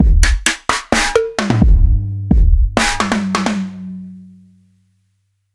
Trap Beat 130bpm
A trap beat made with XLN Addictive Drums in FL Studio 10. 22/10/14
130bpm, addictive, loops, beat, bpm, 130, trap, drums